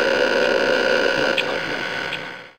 Some of the glitch / ambient sounds that I've created.
idm, reaktor, glitch